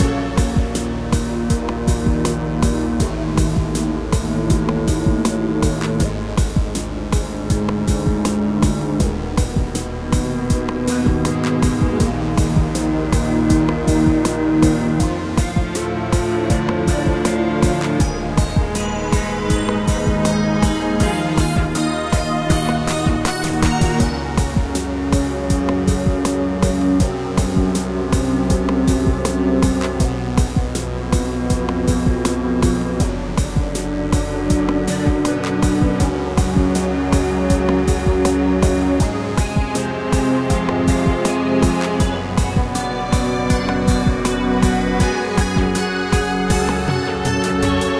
short loops 01 02 2015 4 short 2
made in ableton live 9 lite with use of a Novation Launchkey 49 keyboard
- vst plugins : Alchemy
game loop short music tune intro techno house computer gamemusic gameloop